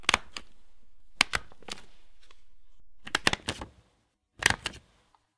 Stapling papers with a medium sized manual stapler. Multiple takes, slow and fast ones.
Recorded with a RØDE Videomic from close range.
Processed slightly for lower noise.
environmental-sounds-research, office, stapler